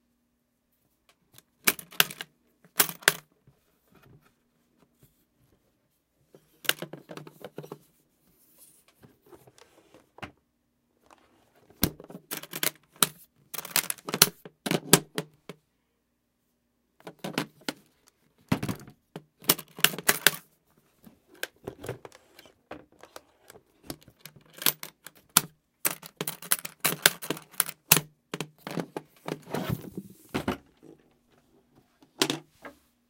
openning breif case 02-01
Opening and closing a brief case, Setting it down, and moving it.
a, case, opening, brief